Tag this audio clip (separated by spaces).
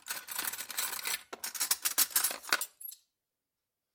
cutlery fork grabbing kitchen knive metal rummaging